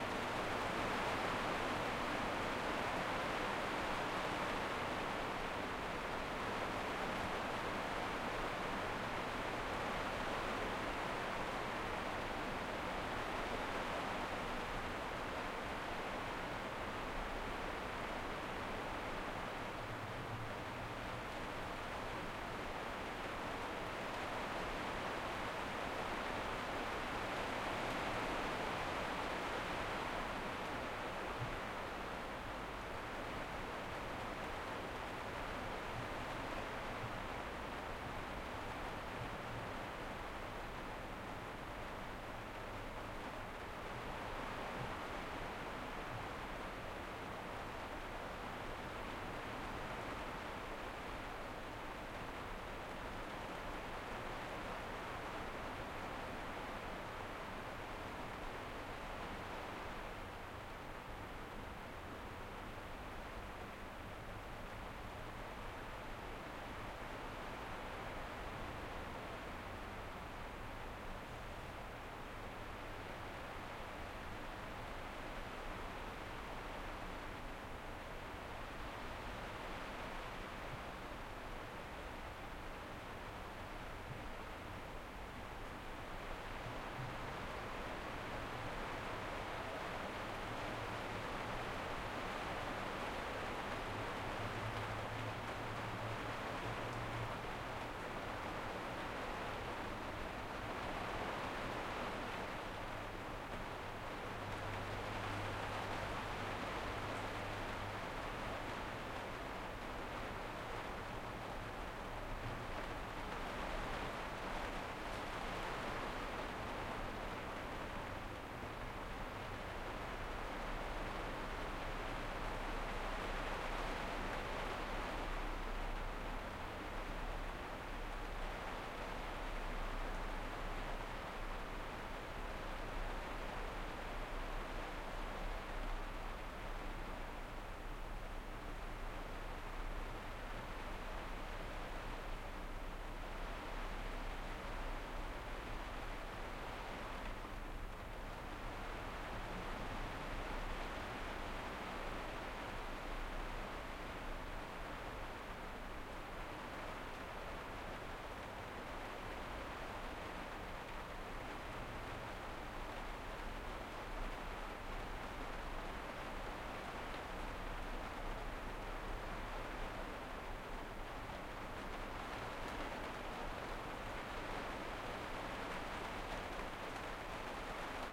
Rain on roof (interior perspective)
Stereo recording of rain falling on the roof of a apartment. Interior perspective, with nice variations of strength.
ambiance, ambience, field-recording, flat, indoors, interior, rain, stereo, switzerland, weather